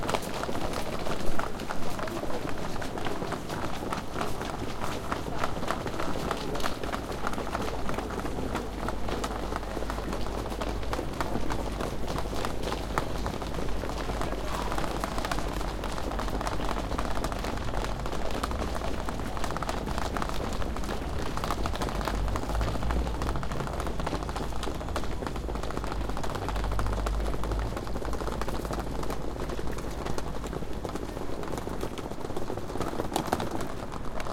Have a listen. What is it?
ambience, railway station, city, Voronezh
Suitcases are moving (rolling) down the platform
Voronezh main rail-way terminal